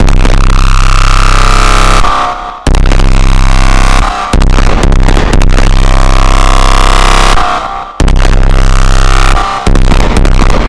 Metalic Slam
Sound made in Sylenth 1 with Third Party Virtual Distortion units.